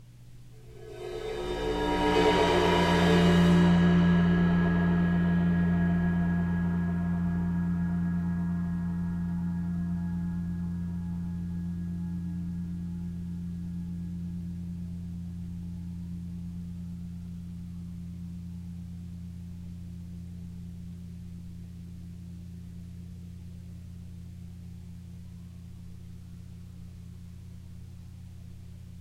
bowed cymbal swells
Sabian 22" ride
clips are cut from track with no fade-in/out.